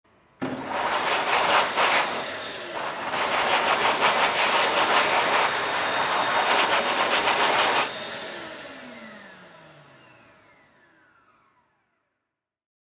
Hand Dryer 8 (hand movement)
Recording of a Hand-dryer. Recorded with a Zoom H5. Part of a pack
Vacuum, Bathroom, Dryer, Hand